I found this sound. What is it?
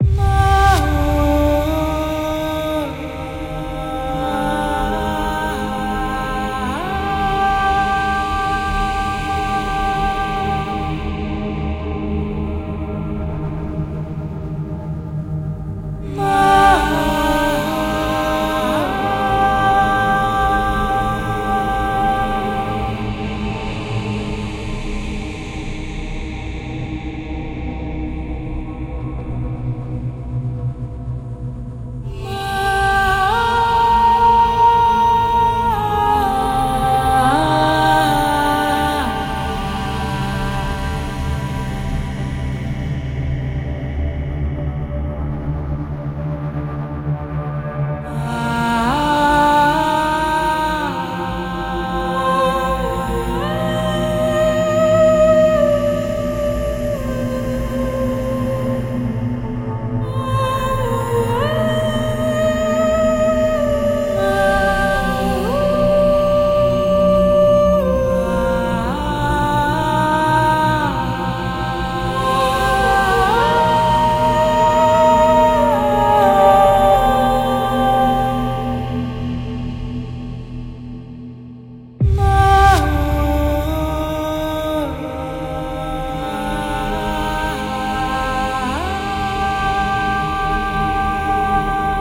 Green Field - Myst Dreamlike Dream Fantasy Female Men Choir Drone Synth Cinematic Atmo
Film, Ambient, Myst, Cinematic, Field, Female, Fantasy, Choir, Synth, Atmosphere, Dream, Movie, Green, Atmo, Drone, Dreamlike, Men